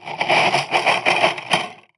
drumbrush rattle 3
Sounds created with a drum-brush recorded with a contact microphone.
brush, close, contact, drum, effect, fx, metal, microphone, sfx, sound, wire